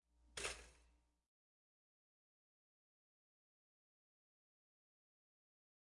mp toaster
A kitchen toaster.
toaster, kitchen, household, toast, food